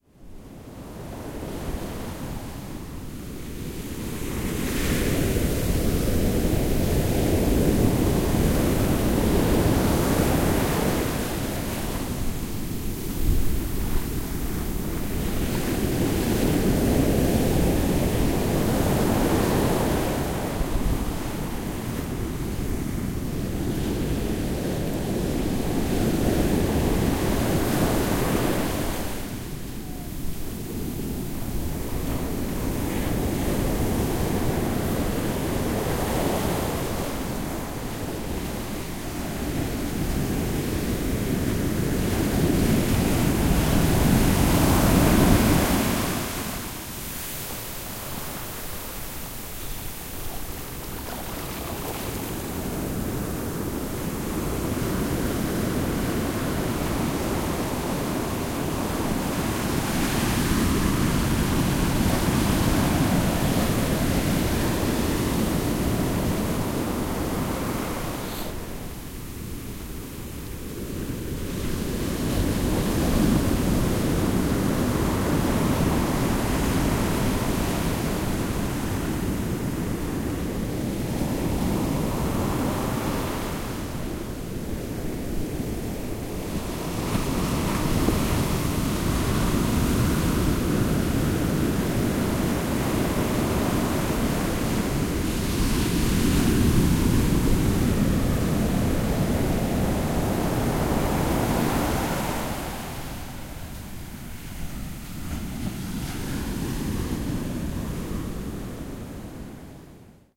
ocean meco
The Ocean in beach of MECO
loud, ocean